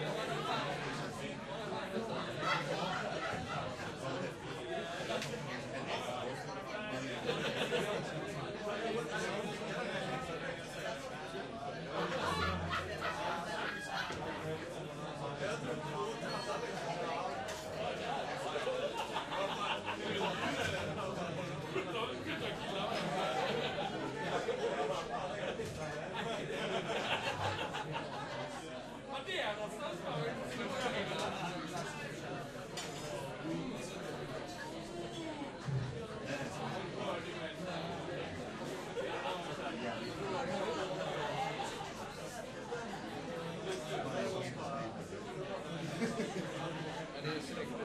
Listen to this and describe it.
An accidental recording of the crowd during a live performance in the sense that I only intended to record music, but the pickup of the crowd was so good I've decided to upload it here.
It was recorded with the two condenser overhead mics of the drumset through a MOTU 8pre connected optically to a MOTU 828x.
The file was then converted to wave for editing and gain added for easier usage.
Enjoy!